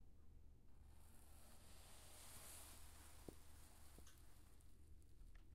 softer curtain brush
This is a very soft sound of someone opening curtains. This curtains are very stiff and was recorded in a concrete room with a carpet. It was recorded on a tascam DR-40.